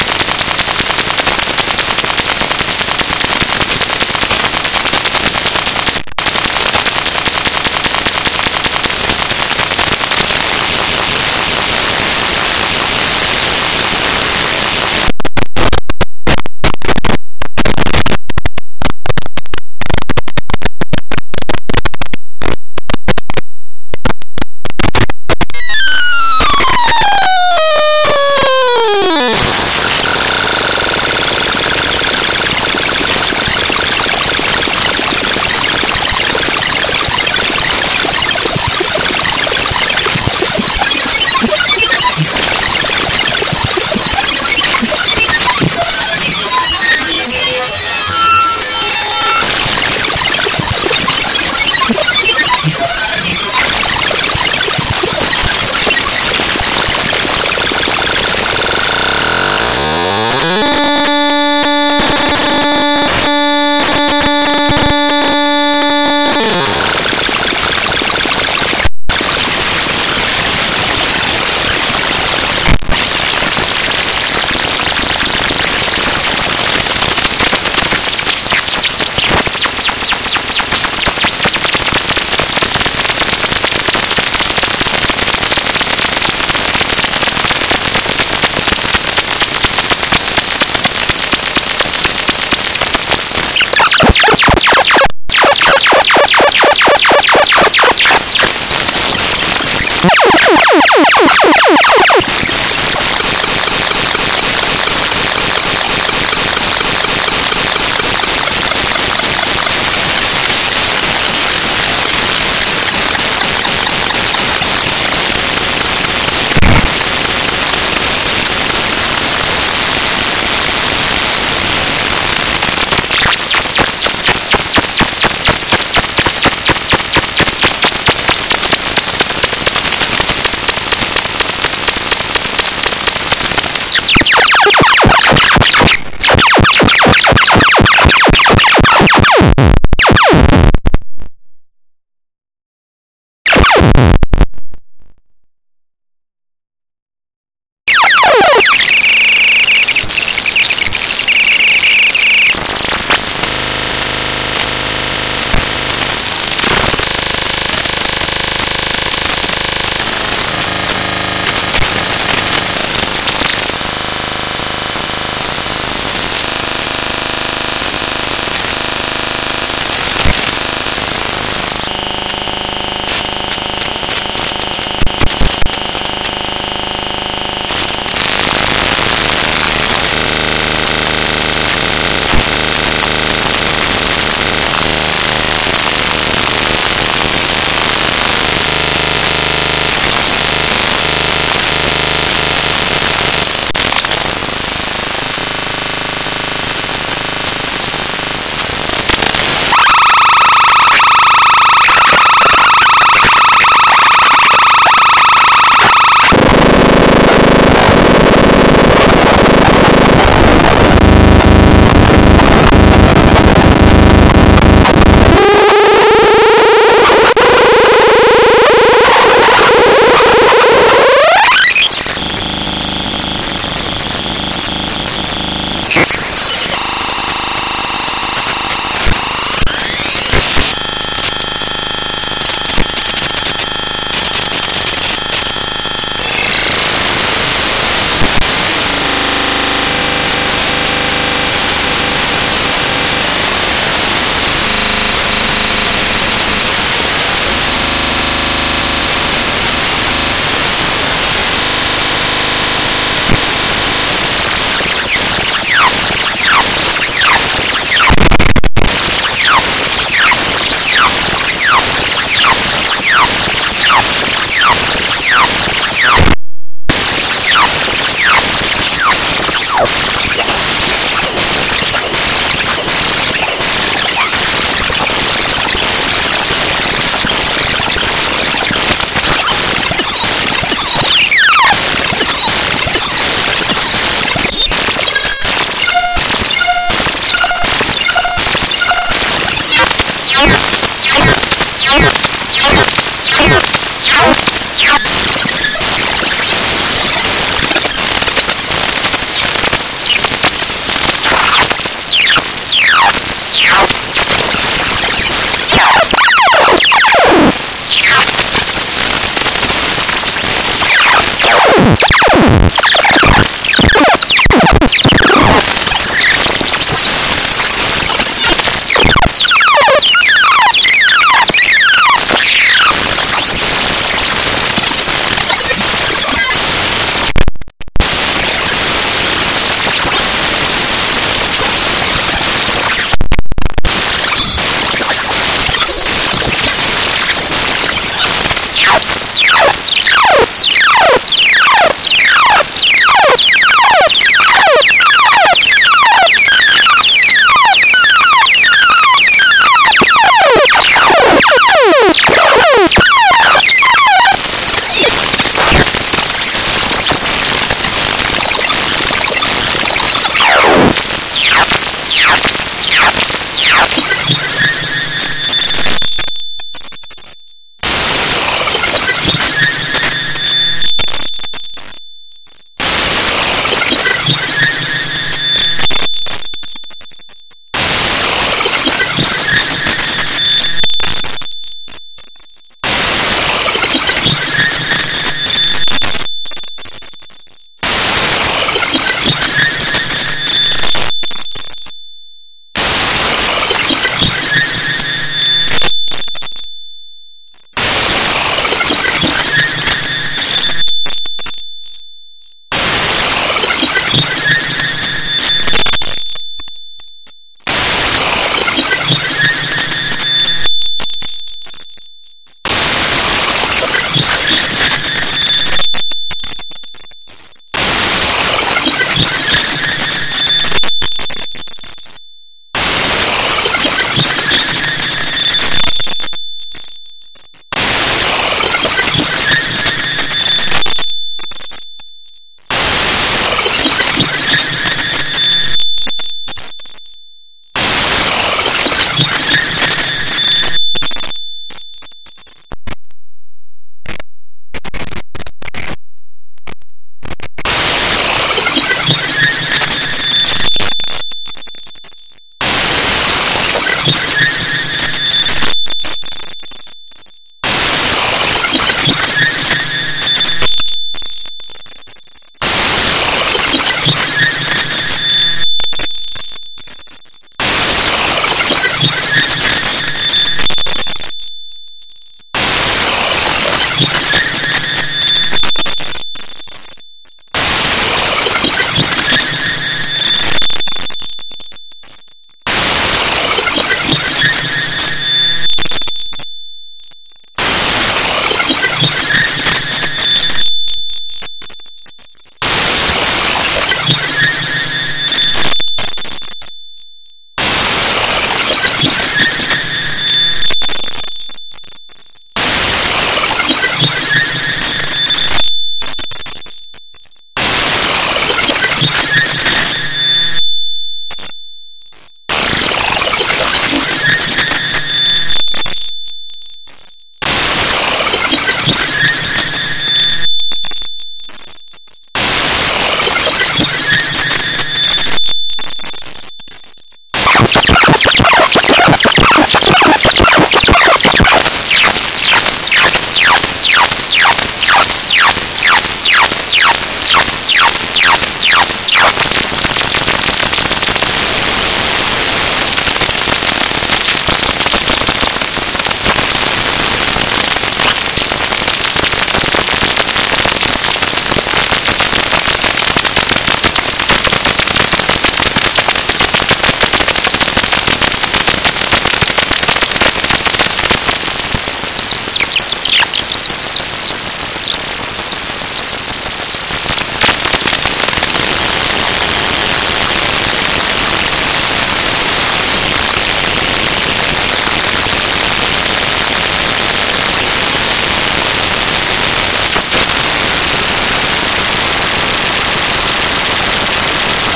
Cyber Noise - GrainSynth
Noise generated with GrainSynth